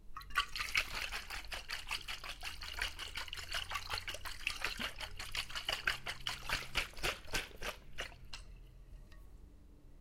Water + glass bottle, sloshing 3
Water sloshing inside a glass bottle.